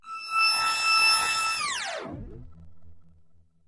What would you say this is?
boring lazer
Another laser effect! actually a warped chord from a home built synth (not mine, alas) sampled and put through granular delay and distortion.
laser, sci-fi, space